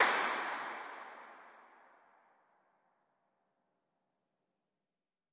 Tunnel 2 Impulse-Response reverb clap afar high-pitch clap
high-pitch, clap, Impulse-Response, afar, 2, Tunnel, reverb